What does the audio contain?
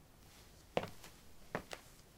Human Walks in Sneaks
A man or woman walking down a paved area with sneakers.
boy, concrete, female, girl, male, sneakers, stone, walk, walking, woman